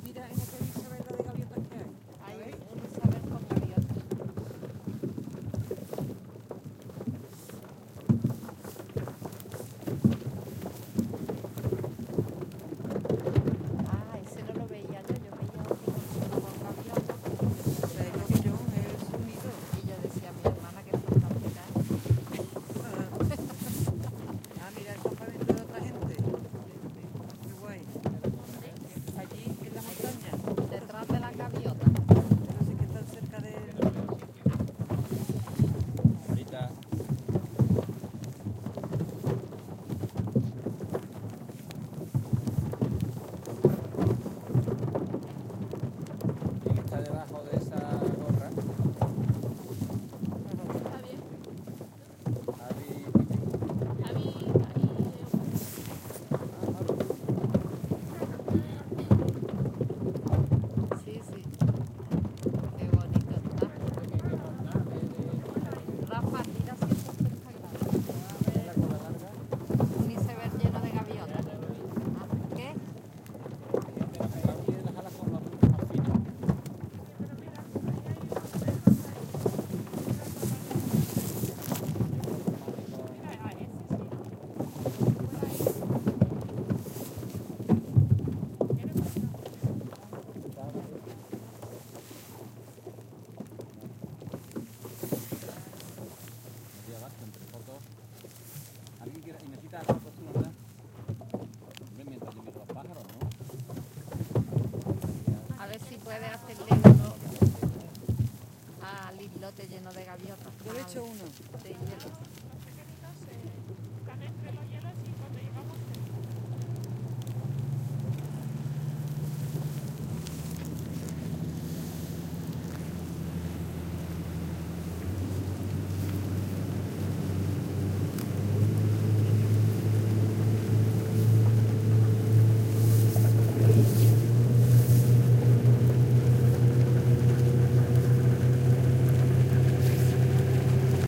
20070821.boat.ice.floats.02
icefloats strike the boat, some voices in background. Motor noise increases near the end of the sample. See the scenery here:
field-recording, flickr, ice-floats, motorboat, voices